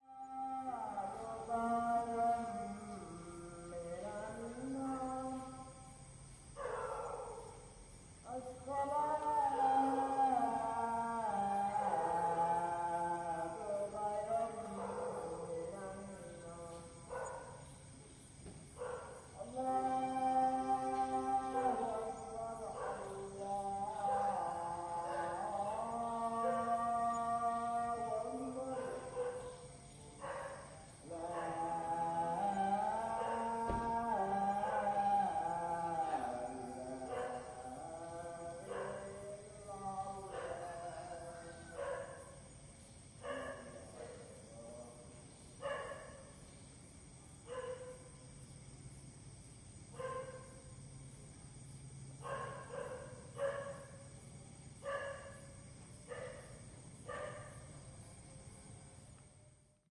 5am Monk
A monk singing at 5am in the morning, recorded from a hotel veranda.